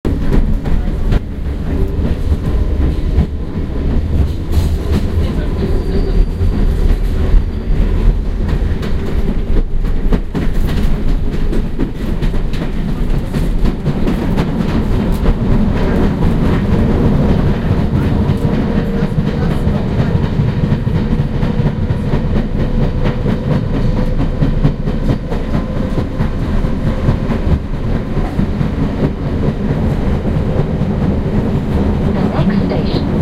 Circle Line Ambience Overground 2